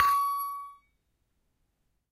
Sample pack of an Indonesian toy gamelan metallophone recorded with Zoom H1.
gamelan, hit, metal, metallic, metallophone, percussion, percussive